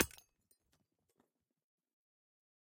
Small glass holiday ornament shattered with a ball-peen hammer. Bright, glassy shattering sound. Close miked with Rode NT-5s in X-Y configuration. Trimmed, DC removed, and normalized to -6 dB.